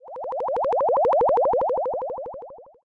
Don't you just love water levels in old platformer games?